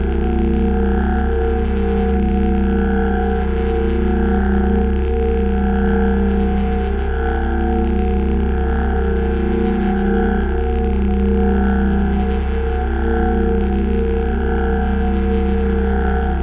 Sound of a teleportation device. This sound was created using my modular synth, more specifically a Black Wavetable VCO from Erica Synth and an Mutable Instruments Clouds. Going through a Focusrite Scarlet 2i4 and finally, through Pro Tools
artificial, electromechanics, machine, science-fiction, sci-fi, sfx, teleporter